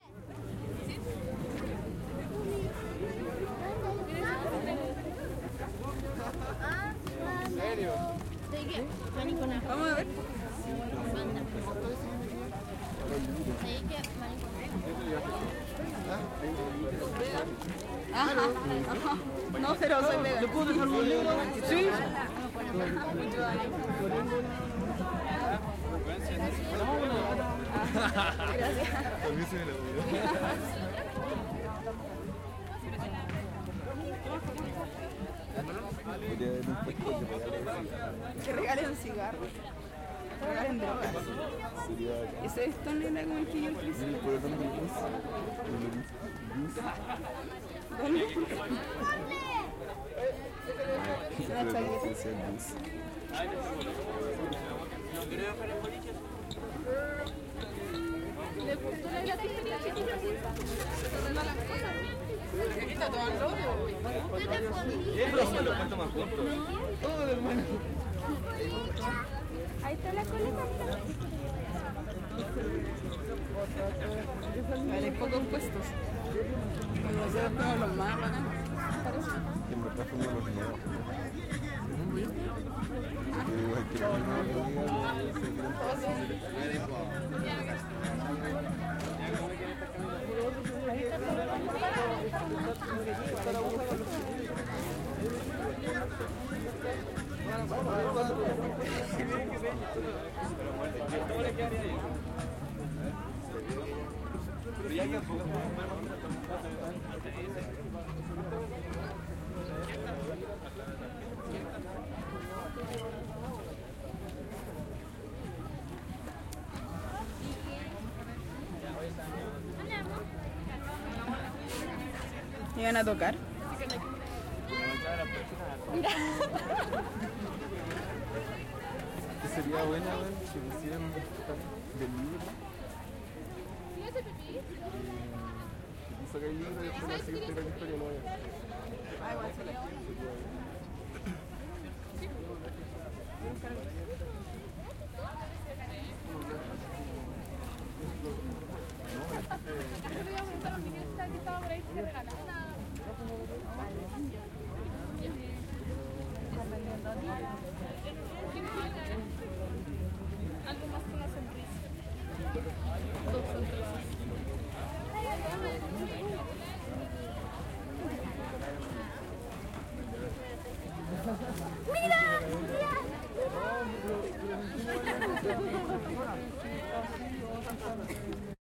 gratiferia 02 - quinta normal
Gratiferia en la Quinta Normal, Santiago de Chile. Feria libre, sin dinero ni trueque de por medio. 23 de julio 2011.
santiago, chile, quinta, market, gratiferia, normal, trade